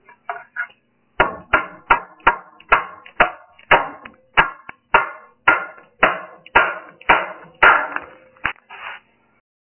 Hammering a 16 penny concrete nail
hammering 16 penny nail into old wood beam into concrete
16-penny, concrete, old, nail, wood, hamering